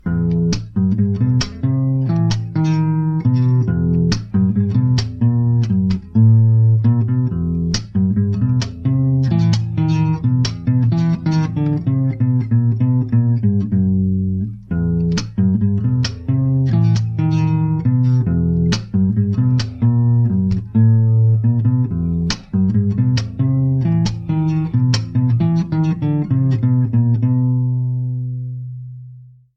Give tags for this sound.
groove; acoustic; loop; guitar; bardolater; Rythm

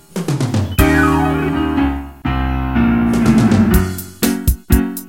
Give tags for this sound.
Music; Original; Piano; Sample; Song

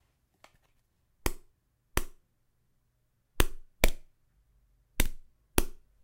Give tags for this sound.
foley; golpe; sonido

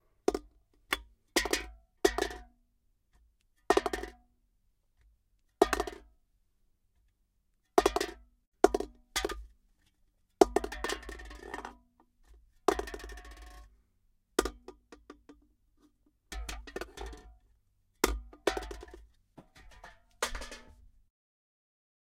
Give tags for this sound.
can; falling; aluminum; beer; handling; tin; soda; beverage; drink

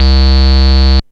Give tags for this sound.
acid; bass; electro; psytrance; techno

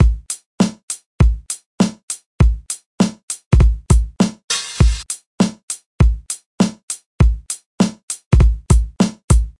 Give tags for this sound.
beat; hiphop; loop; drums

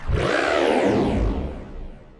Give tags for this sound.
Spacecraft; Electronic; Futuristic-Machines; Space; Sci-fi; Futuristic; Noise; Take-off; Mechanical; Landing; Alien; UFO

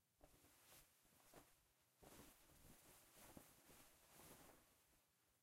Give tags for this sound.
fabric; cloth; friction

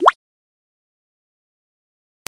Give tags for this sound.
cute; little; old; school; sound

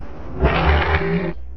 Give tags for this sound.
GARCIA; MUS153; ROAR

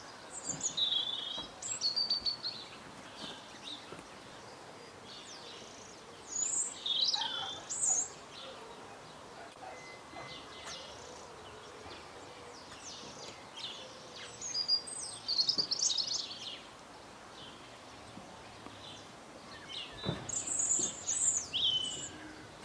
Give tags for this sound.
bird-song; garden; field-recoding; Robin; rural

